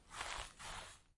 S01 Footsteps Grass
Footsteps on grass
footsteps, nature